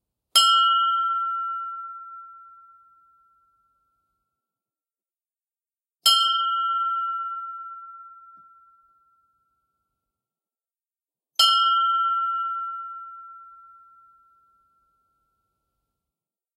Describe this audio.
Ship Bell - From 1936
Sound of authentic ship bell from 1936. Rocord with H1 ZOOM with a little bit editing.
1936, Antique, authentic, Bell, Boat, design, effect, game, old, past, recording, Ring, Ringing, sails, sfx, Ship, sound, sound-design, sounddesign, sound-effect, soundeffect, water